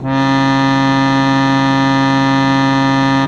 single notes from the cheap plastic wind organ
note, organ, single, wind, f